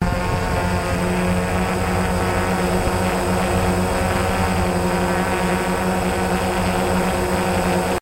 Phantom hovering on station with fairly steady moto noise.